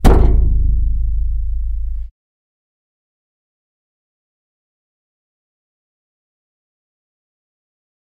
Hand On Metal 1
A hand slapping against a piece of metal.
metal, hand, ring